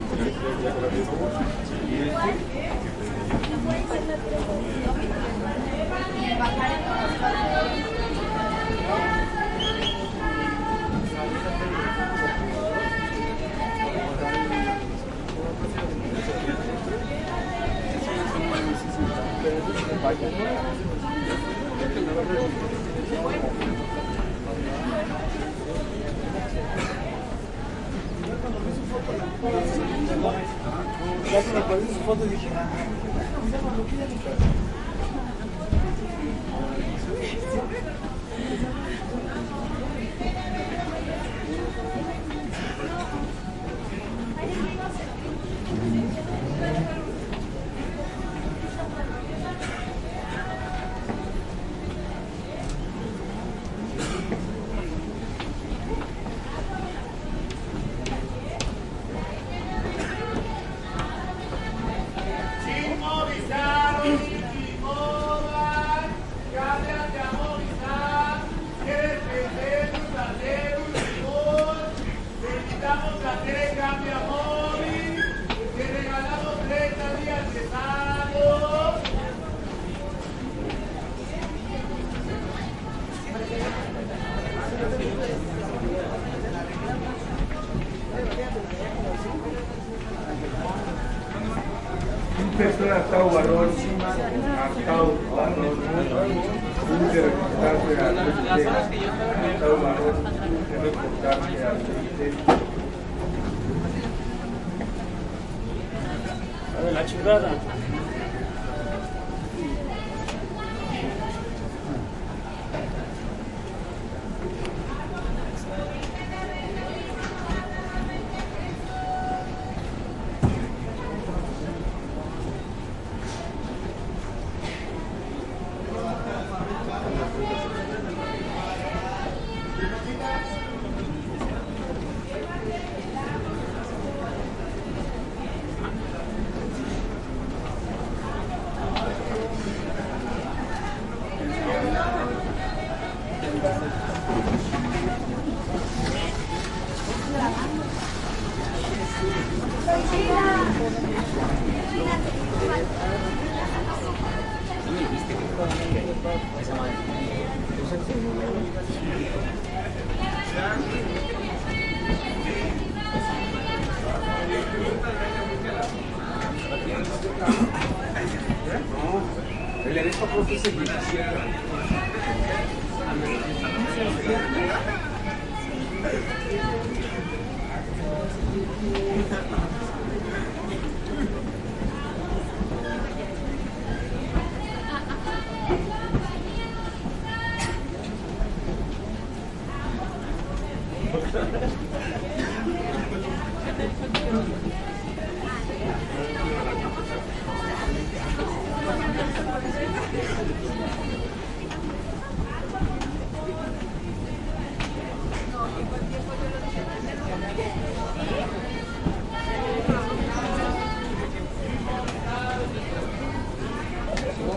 Inside Subway Station Mexico City
Big crowd walking inside a subway station corridor in Mexico City. Vendors shouting nearby, selling cellphones and various things. Lots of spanish wallace.
Recorded with a Zoom H6, XY capsule. Raw file.
chat, corridor, crowd, crowded, metro, Mexico, Mexico-City, people, spanish, station, subway, vendors, wallace